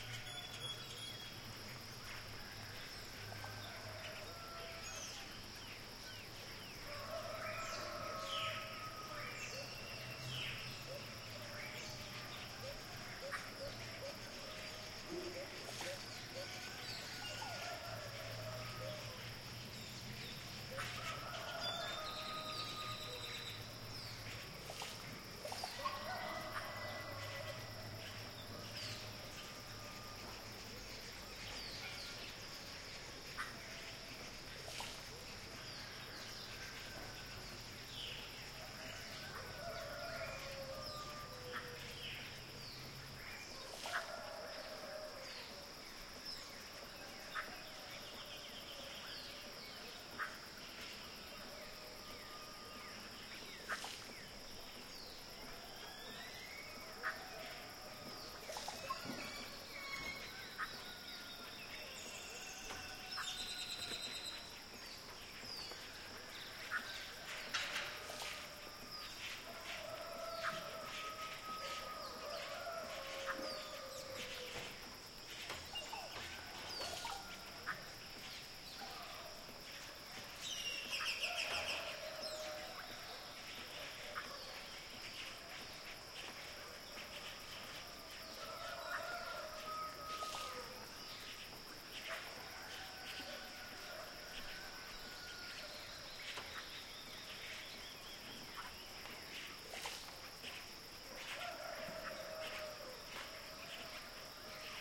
Village jungle morning
Morning in the mexican jungle at distance of a small village. Many birds and insects, animal movement in leaves, a few water drops on a metal sheet
activity, birds, dogs, field-recording, fowls, jungle, leaves, metal-sheet, morning, rain-drops, village